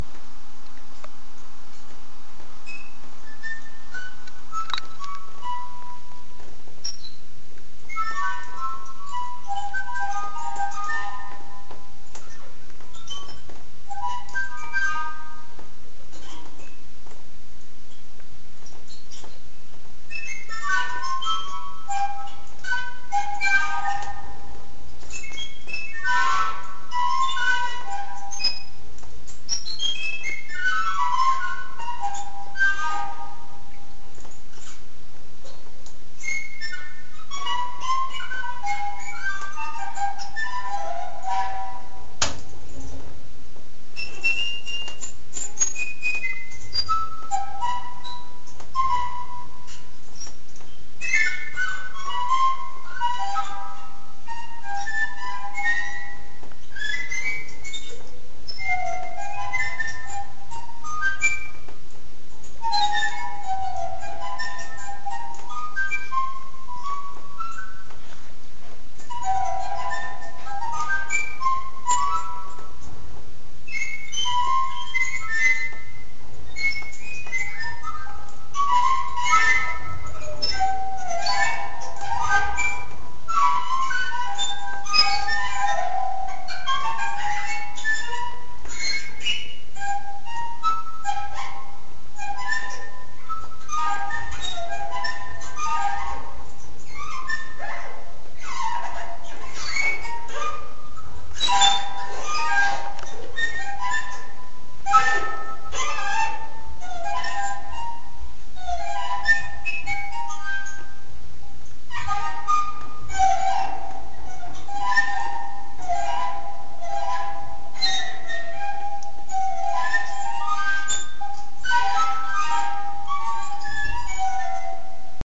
This is the first of two improvisations created by recording the strange sounds caused by the reverberation of the bird cage bars while cleaning it.